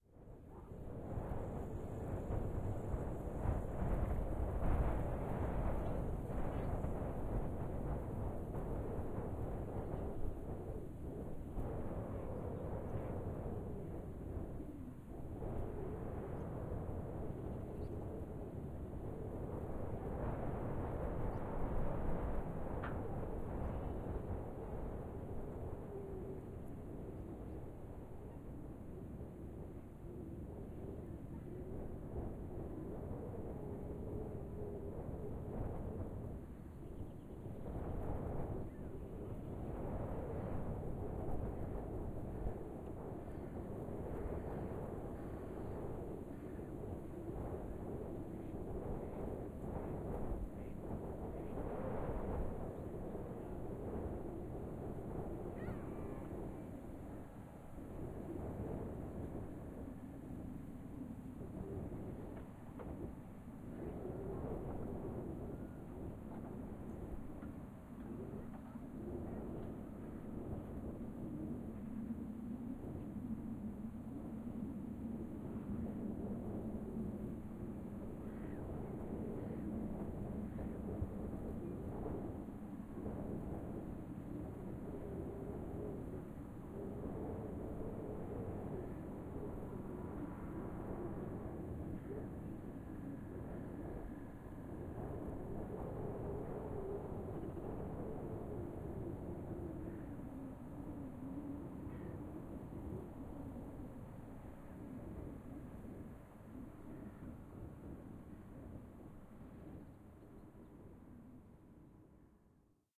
wind MS
a nice wind, recorder in open field
field-recording, wind